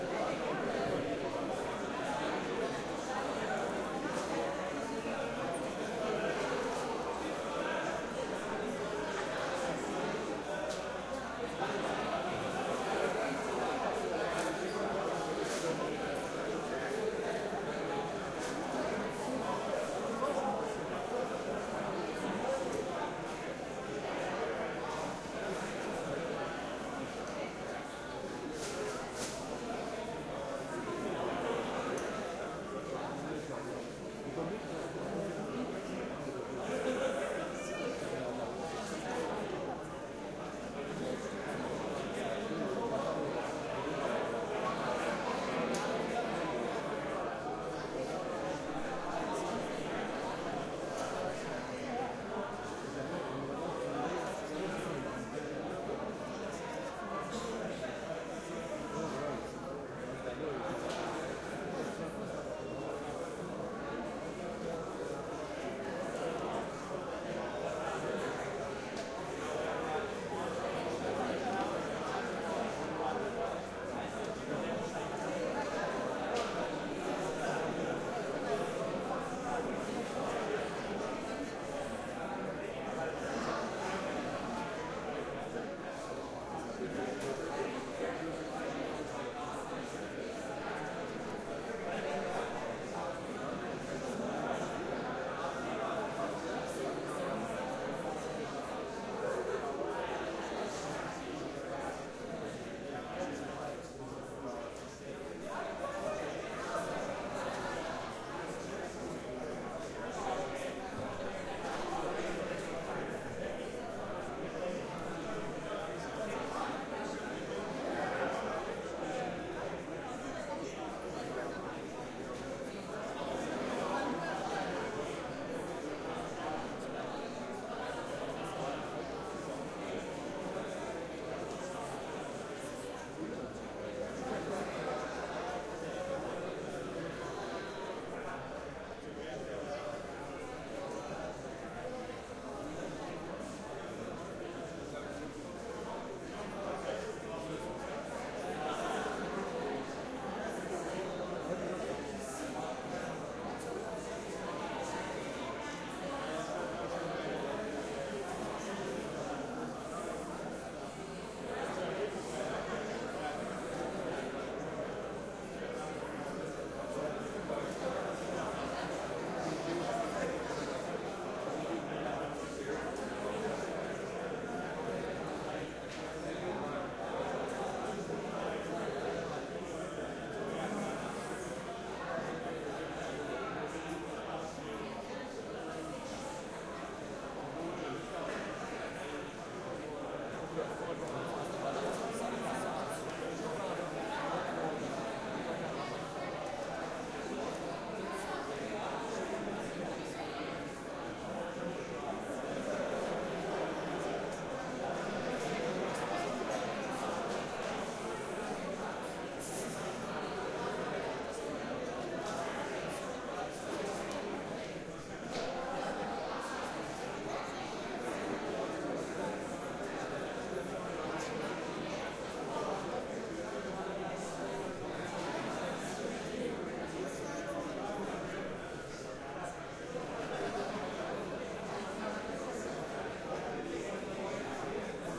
Busy airport lobby, language neutral, Canada.
localization-ambience, airport